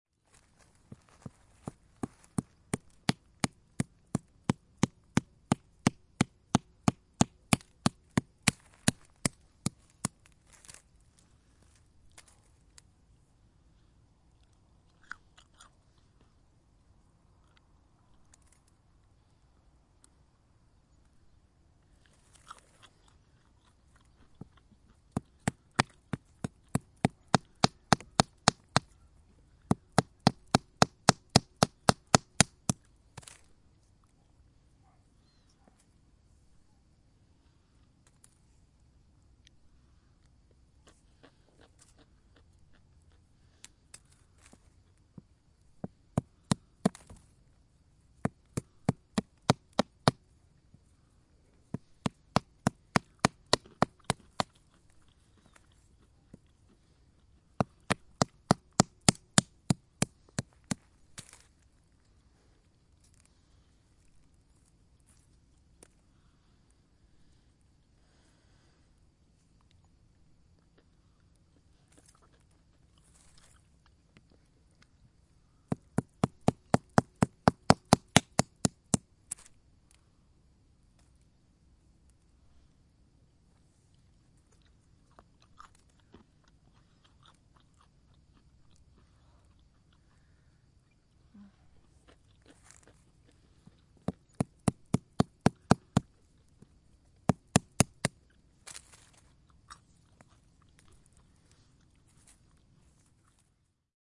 Cracking almonds - h4n
Cracking almonds with a stone and eating them in the countryside in Marata. Recorded with a Zoom h4n on July 2015. This sound has a matched recording 'Cracking almonds - mv88' with the same recording made at the same exact place and time with a Shure mv88.